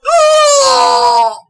another surprised OOOH
lol i record my voice while playing video games now so that i can save certain things i say, you know, for REAL reactions to use for cartoons and stuff. a lot of my voice clips are from playing games with jumpscares, and that's where my screams and OOOOOOOHs come from. i used to scream ALL the time when playing jumpscare games, but now it's turned into some weird growl thing or somethin, i dunno. so yeah, lots of clips. there are tons of clips that i'm not uploading though. they are exclusively mine!
and for those using my sounds, i am so thrilled XD
english, exclamation, female, jump, scare, scared, speak, startled, talk, voice, woman